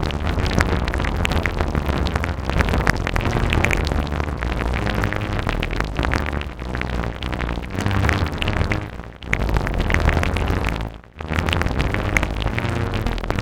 Sizzling granular synth noise.
grains, synth